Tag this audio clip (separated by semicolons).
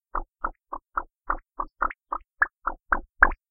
drip; toony; water; drops; dripping; cartoony; fish; drop